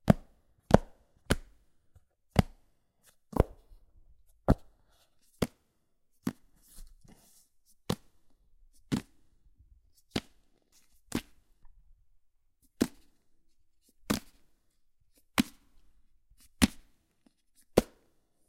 book grabs
Throwing and picking up a book a bunch of times